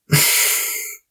Human Breath - Misc
sigh, breathe, man, voice, exhale, speech, inhale, human, male, mouth, sleep